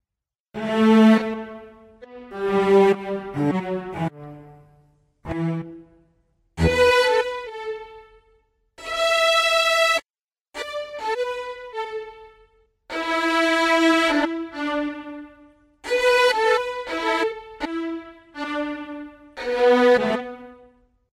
These are string samples used in the ccMixter track, Corrina (Film Noir Mix)